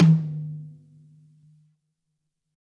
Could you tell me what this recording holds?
High Tom Of God Wet 005
pack, drum, tom